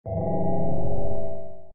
dramatic sound 2
ableton, sfx, Strange-sound